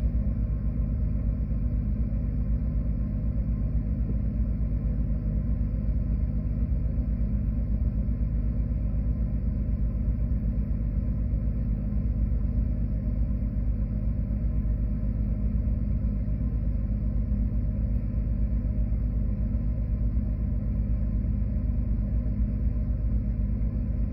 low machine hum
This is the hum of an old freezer running. The microphone (Galaxy S9) was placed inside and the door shut.
ac, air, air-conditioning, blow, fan, fridge, heavy, hum, industrial, low, machine, mechanical, motor, noise, refrigerator, vent, ventilation, ventilator